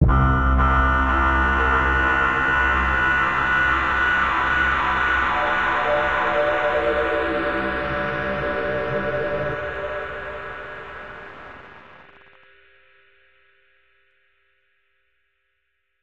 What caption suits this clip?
Something noisy with some ambience,with a lot of a lousy reverb...